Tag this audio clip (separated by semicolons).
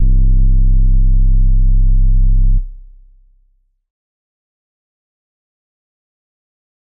free; guitar; drums; loops; sounds; filter